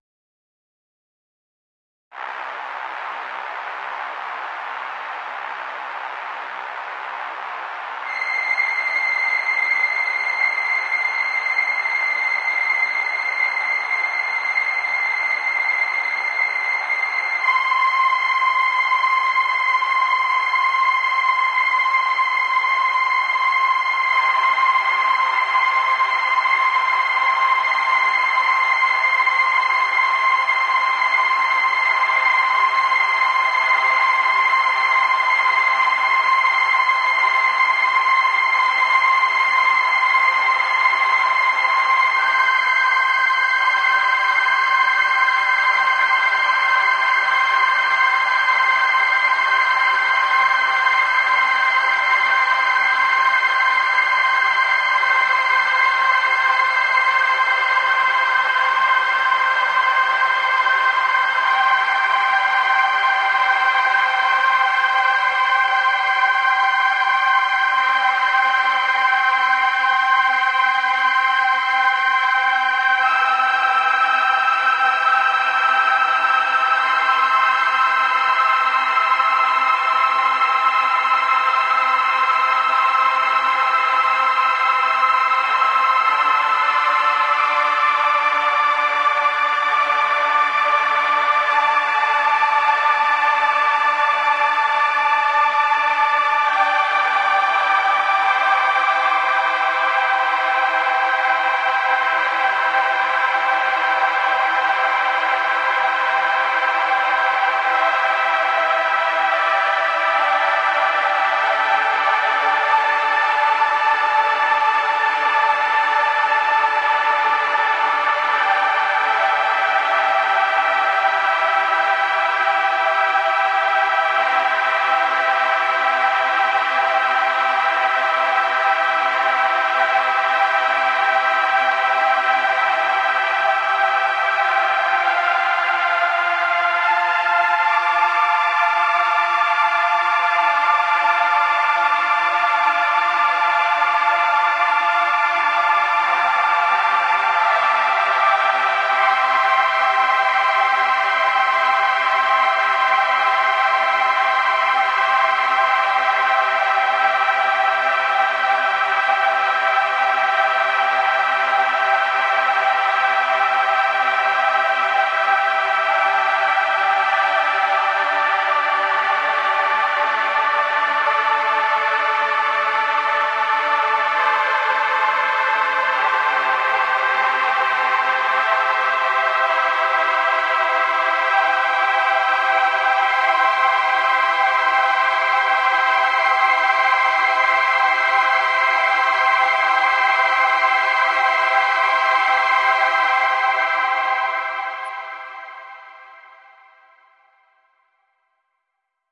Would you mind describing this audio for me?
Synth tones
Low and high tones in a calm continuum. Playing my keyboard, slow notes. Used it for background for narration of a video about the universe.
calm, harmonies, slow